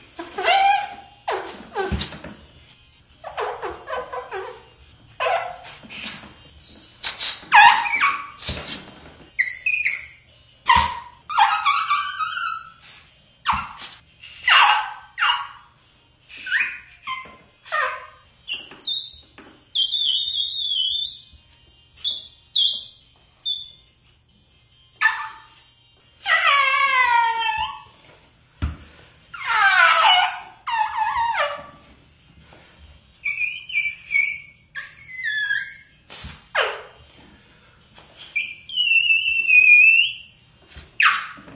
Playing with a bike pump. The files has been edited to the best parts. Some degree of headphone bleed is audible in the recording.
This makes a pretty good whale sound if you stretch it 500-1000 percent.
bathroom, bike-pump, squeak, squeal, tile